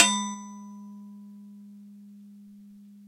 Recording direct to PC back in 1999. Hitting a 6" spackle knife with a wrench or a screwdriver (I forget).